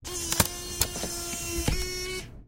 cdrwcocas processed
sound of a cd coming inside a mac laptop, slightly processed for sound optimization...
cd, mechanical, technology